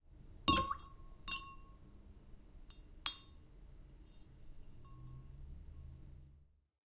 Water + ice cube 2
An ice cube being dropped into a glass of water.
cube, drip, dripping, drop, droplet, effect, foley, fx, ice, icecube, Water